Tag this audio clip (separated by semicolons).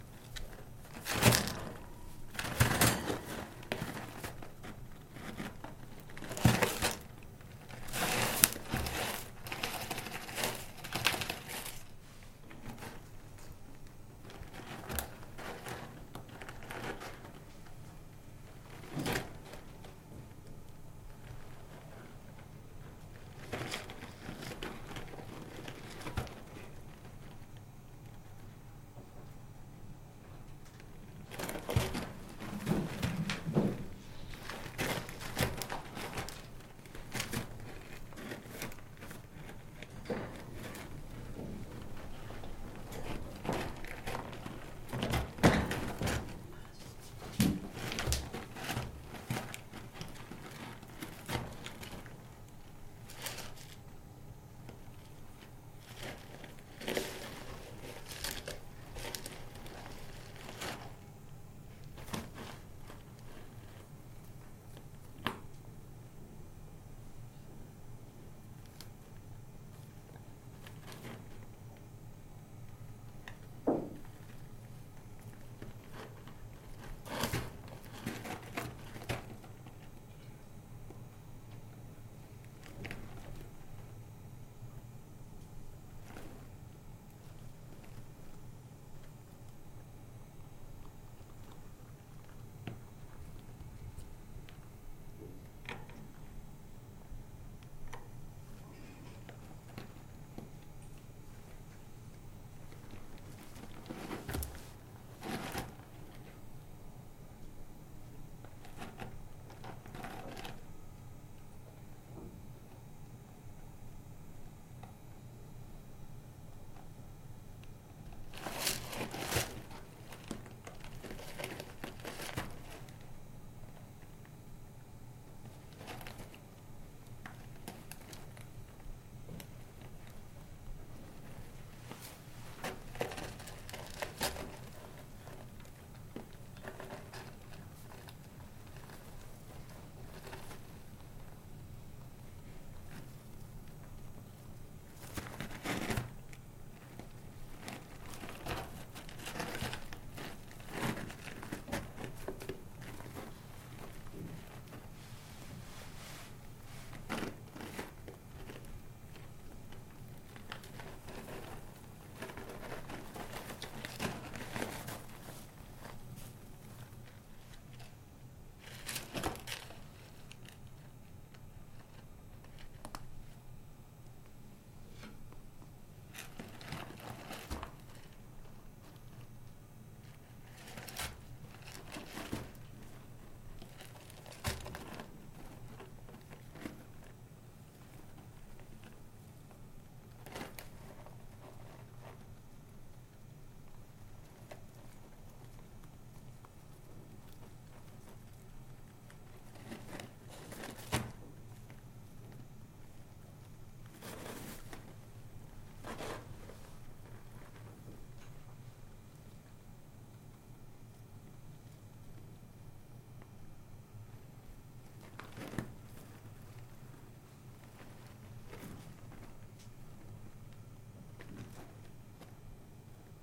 cage; design; hay; metal; moving; Sound